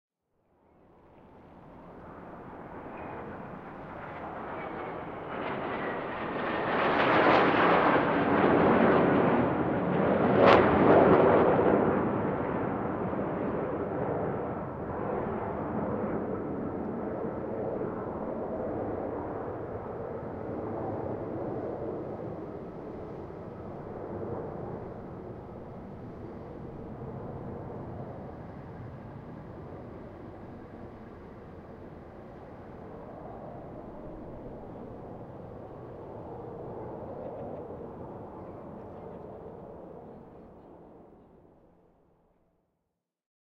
ps flighby 01
A long turn of a single plane. In the beginning and at the end of the recording you will hear some unwanted sounds of bystanders and traffic but you might be able to use the main flight sounds.
This is a recording with normal input gain.
plane, airplane, field-recording, fast-pass, aircraft, jet, fighter, aeroplane, military